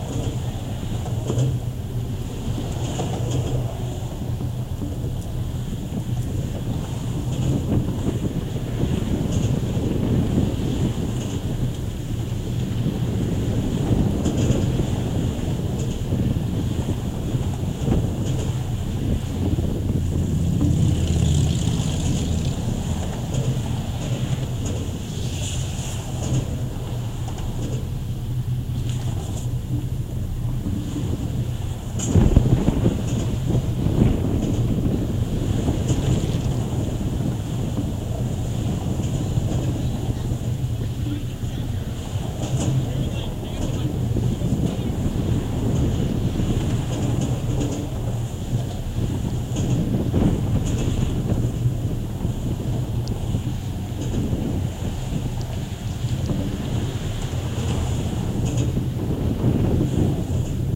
GGB A0217 main cable at NE pylon
Contact mic recording of the Golden Gate Bridge in San Francisco, CA, USA from the main cable near the northeast pylon. Recorded October 18, 2009 using a Sony PCM-D50 recorder with Schertler DYN-E-SET wired mic.
metal, steel, Schertler, contact, microphone, Sony-PCM-D50, wikiGong, cable, contact-microphone, field-recording, Golden-Gate-Bridge, DYN-E-SET, contact-mic, bridge